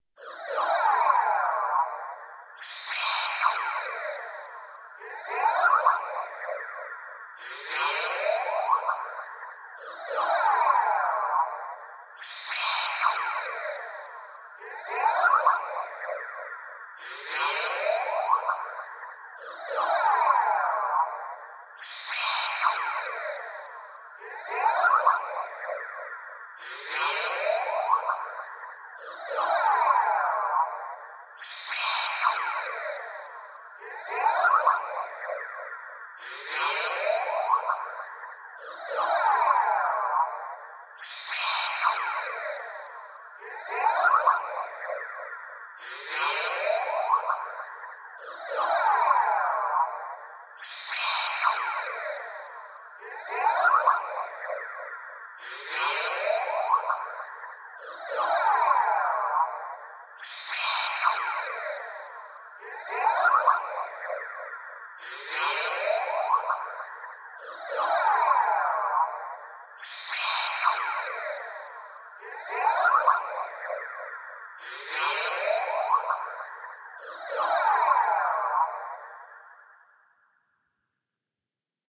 a lo fi aural interpretation of an alien landscape.....circa 1950.
sci-fi, alien-landscape, b-movie, 50s, alien-effects, spaceship, alien-fx